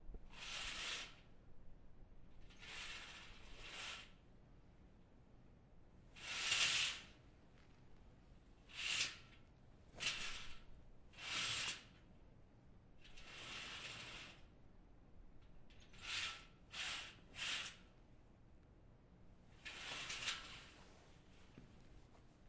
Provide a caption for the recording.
Recorded using a Zoom H6 microphone. Recorded in a Grotto with two sets of curtains being dragged.